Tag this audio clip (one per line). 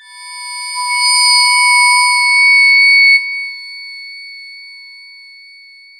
experimental
tubular
reaktor